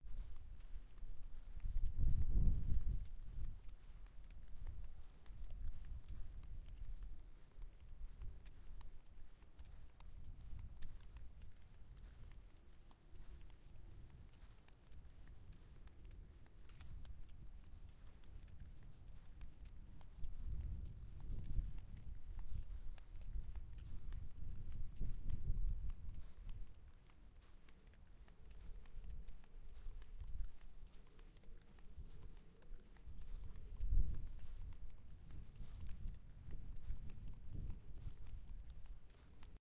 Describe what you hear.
Some recordings of Menorca in vacations the last summer.
island, menorca, sea, summer, wind